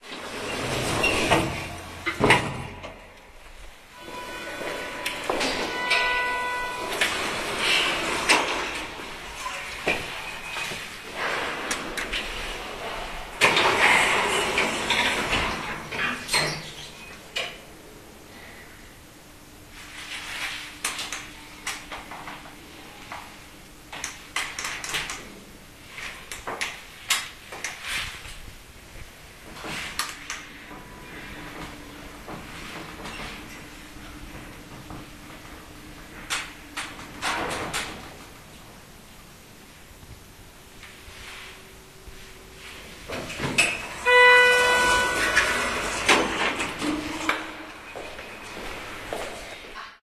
03.05.2010: about 21.00. The lift sound in the student hostel on Nieszawska street in the city of Poznan (Poland).
elevator, student-hostel, poznan, lift, nieszawska, field-recording, poland